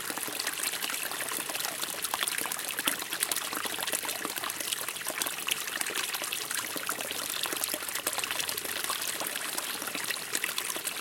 A looped recording of a closely miced forest stream.
Recorded on a summer afternoon using Zoom H4n, at Rosendal, Nerikes Kil, Sweden.